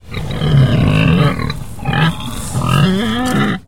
Pig Grunt 04
A pig grunts at another pig (or creature) in annoyance.
nature, animals, annoyed, farm, creature